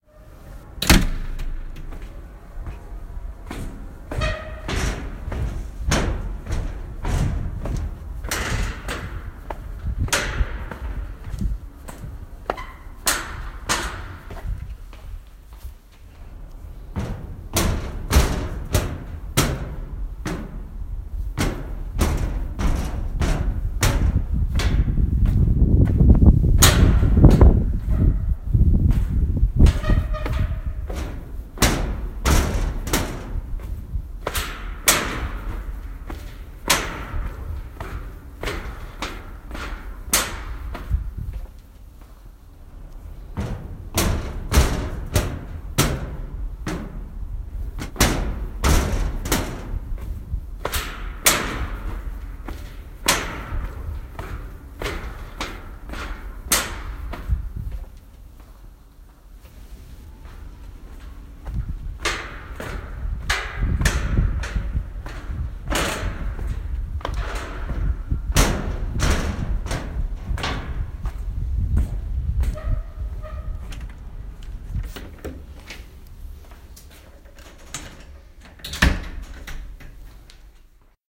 Walk on metal floor, iron, tin - Pasos en metal, hierro, chapa
footsteps iron metal tin pasos hierro chapa
hierro, footsteps, metal, chapa, iron, tin, pasos